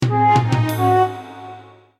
development-card-draw
This short progression is originally intended for when a player draws an unknown face-down development card. Created in GarageBand and edited in Audacity.
synthesized, electronic, digital, notification